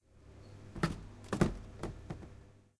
Throwing something to trash
In Tallers Building at Poblenou Campus (UPF) in the vending machine area.